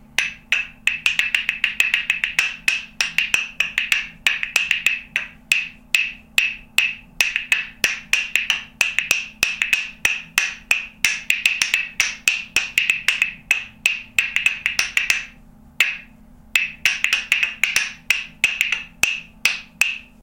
Clap Rythym 5
Sounds from a Didgeridoo
aboriginal, didgeridoo, woodwind